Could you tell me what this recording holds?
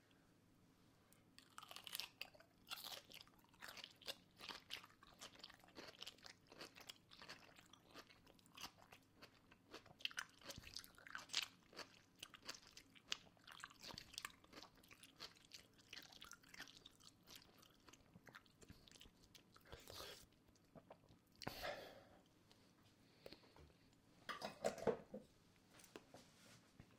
Me eating gherkins, close miced. Recorded with a Rode NGT2 mic into an M-Audio Fast Track Pro and Sony Vegas. Recorded in my kitchen.